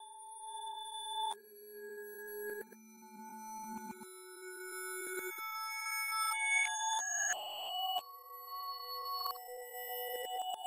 bells made from sine wave